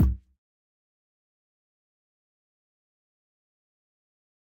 this series is done through layering and processing many samples of drum sounds i synthesised using various plugins namely xoxo's vst's and zynaddsubfx mixed with some old hardware samples i made a long time ago. there are 4 packs of the same series : PERC SNARE KICK and HATS all using the same process.
hit, deep, thump, bass, synthetic, percussion, bd, sample, drums, kick, boom, low, drum, processed